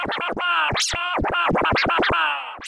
57920 Trance-Scratch
scratch, turntables